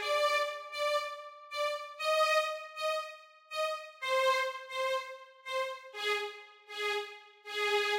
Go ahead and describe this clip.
Orchestral Strings
Electronic violin sound, short stabs, unprocessed, slightly tweaked from a Garageband preset.
strings, electronica, synth